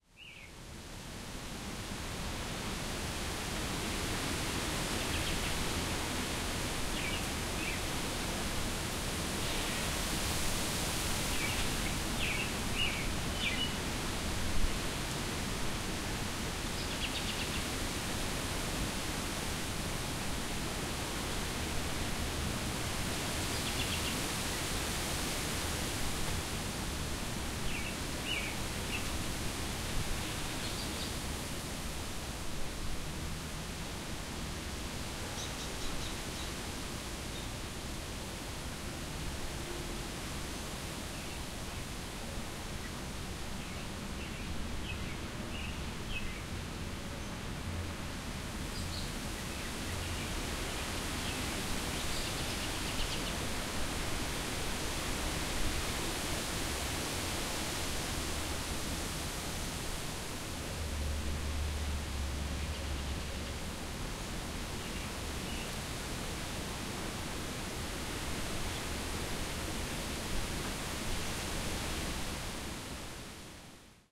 WIND AMBIENCE WITH BIRDS- 1 MIN SAMPLE
This is a field recording of wind blowing in varying intensities, while birds chirp and sing in the background.
ambience, ambient, bird, birds, field-recording, forest, nature, spring, wind